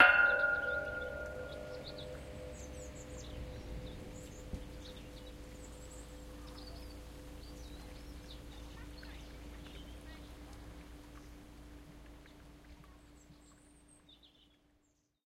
Aluminium Pole 2
A recording I made hitting a large metal pole I found in Kielder Forest, Northumberland UK.
Recorded on a Zoom H2N, normalised to -6dBFS with a fade out.